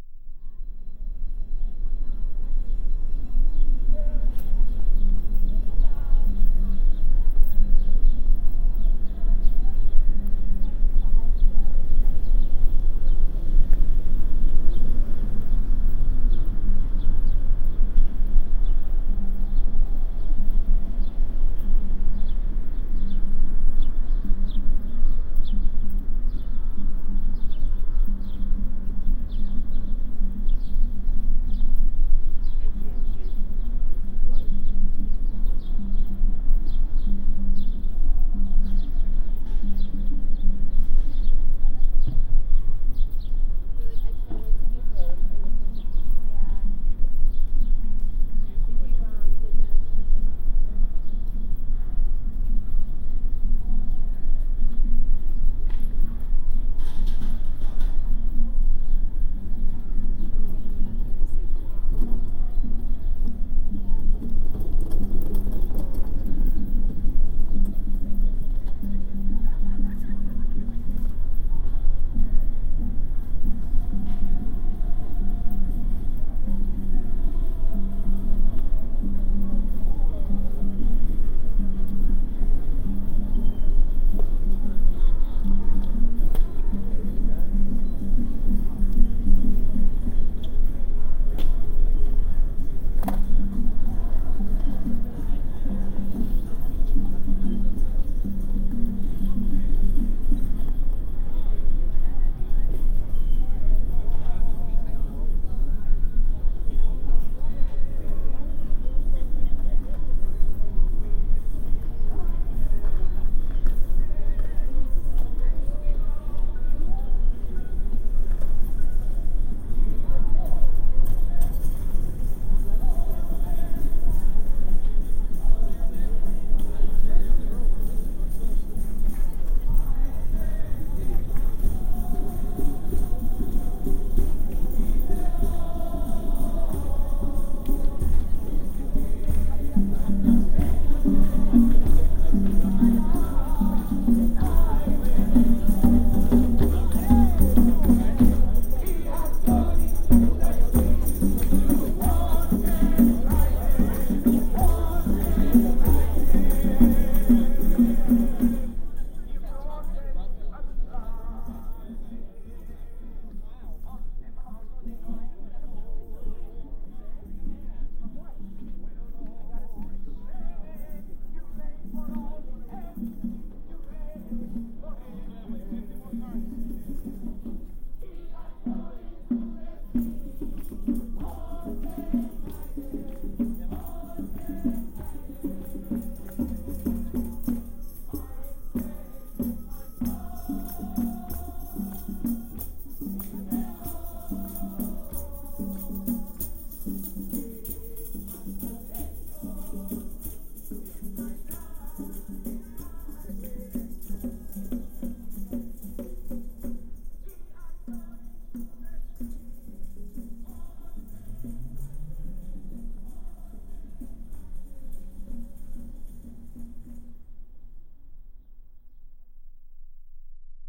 washington square holophone binaural
This is a field recording that me and a school-mate captured. We recorded sounds in Washington Square Park (New York) using a Holophone microphone. Hopefully you will find some useful material for games/films in here!
new-york, 3-dimension, nyu, field-recording, washington-square-park, holophone-microphone